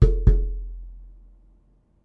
Water cooler bottle x2
Bang the water cooler bottle (19 L) 2 times.
boom, coller, office, office-cooler, plastic-bottle, water-cooler-bottle